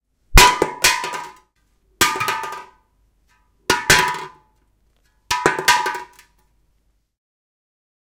Empty Can Drop
An empty red bull can falling on the floor.
Result of this recording session:
Recorded with Zoom H2. Edited with Audacity.
red-bull, dropping, junk, beverage, drink, empty, can, drop, trash